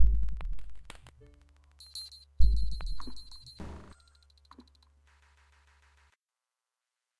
doggy glitch3
lowercase minimalism quiet sounds
minimalism, quiet, sounds